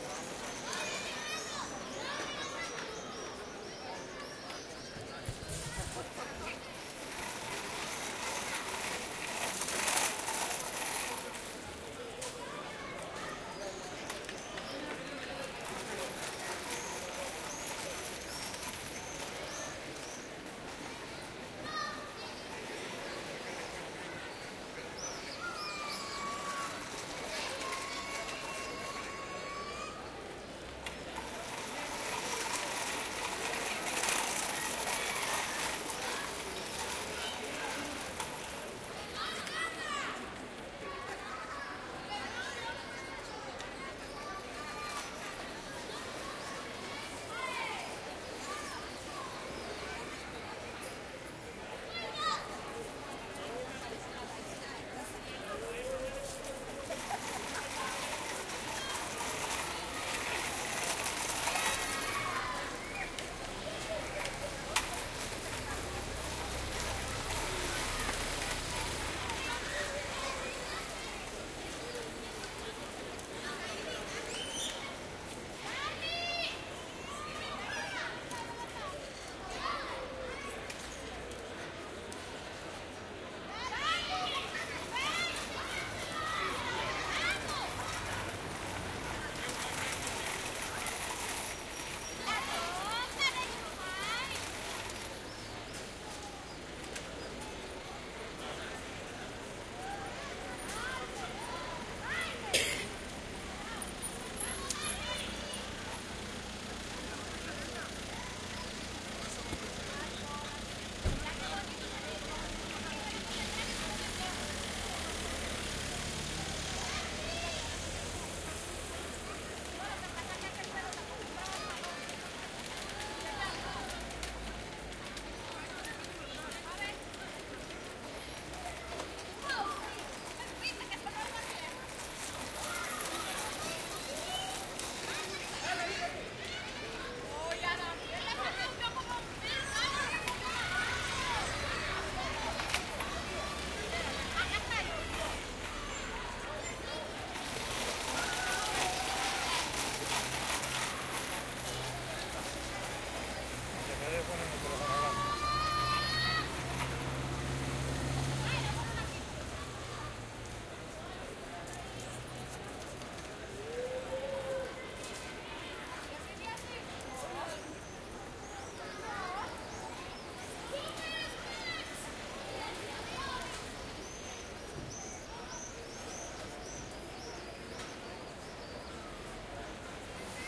playground active bright children playing with rolling planks with passing cars and spanish voices Madrid, Spain